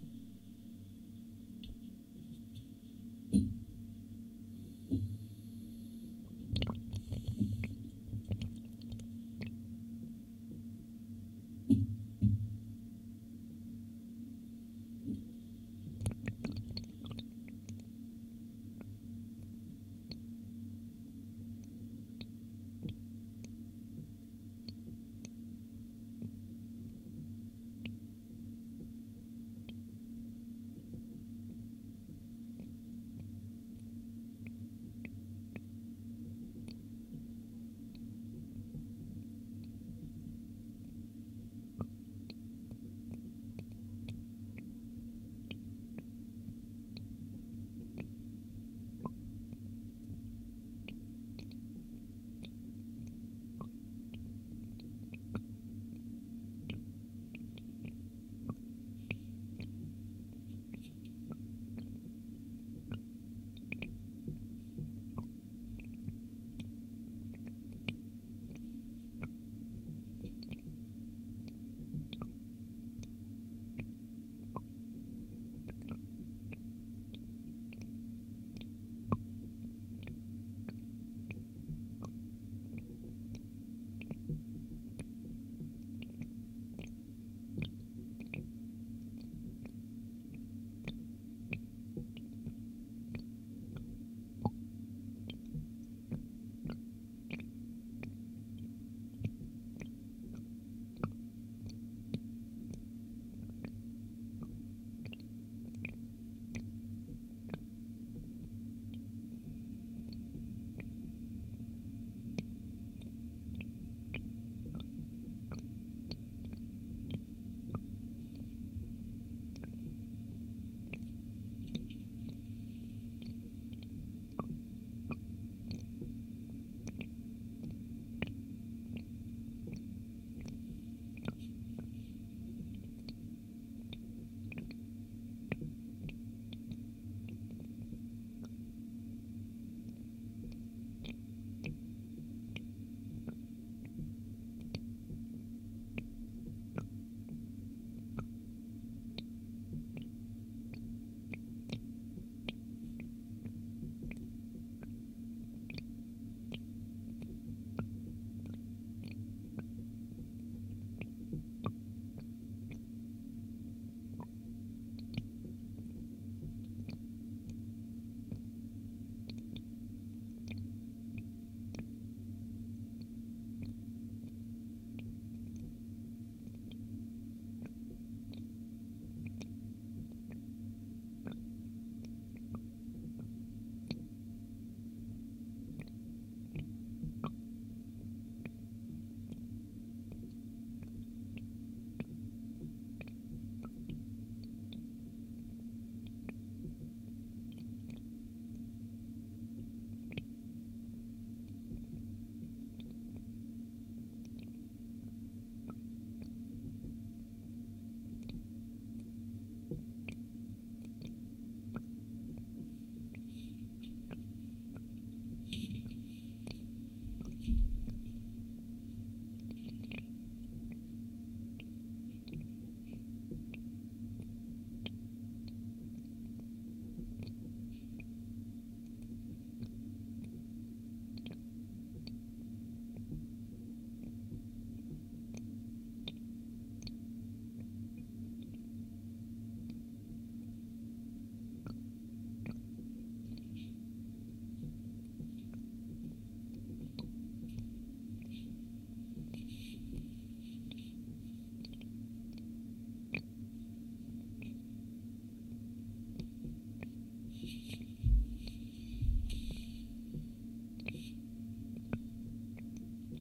Water goes through sink pipe. Recorded on Barcus Berry 4000 mic and Tascam DR-100 mkII recorder.